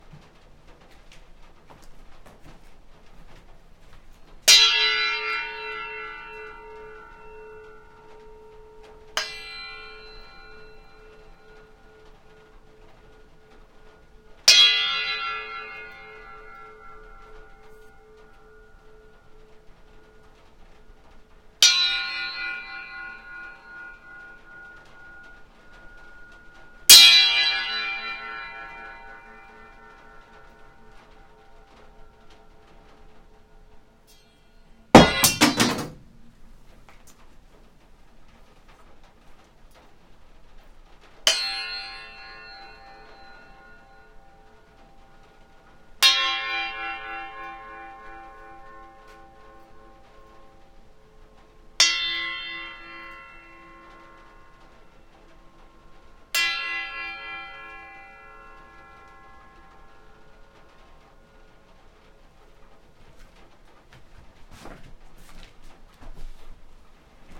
A metal bar on a string.
metallic, percussion, metal-bar, metal, chimes, rotation